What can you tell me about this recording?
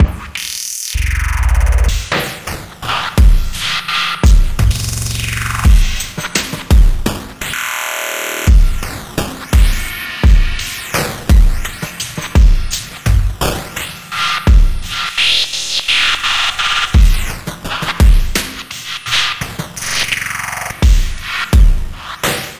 Glitch Drum loop 9d - 8 bars 85 bpm
Loop without tail so you can loop it and cut as much as you want.
percussion-loop, beat, electronic, glitch, drum, loop, drums, drum-loop, groovy, rhythm, percussion